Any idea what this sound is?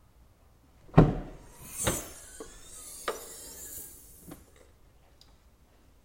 Opening car hatchback door inside garage (2003 Subaru Forester)
Opening my 2003 Subaru Forester's hatchback door w/ hydraulic noise included inside garage.